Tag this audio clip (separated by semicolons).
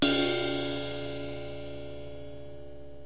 crash; cymbal; drums; e; funk; heavy; hit; live; metal; ride; rock; splash